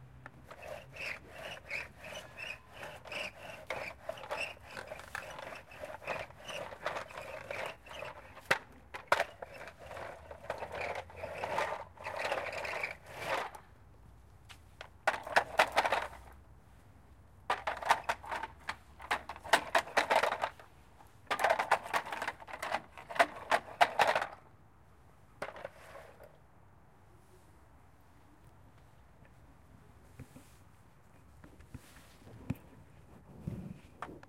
SonicSnaps-IDES-FR-toytruck
A plastic toy truck is rolled on the ground and on a wooden children's climbing frame.
France, IDES, Paris, toy, truck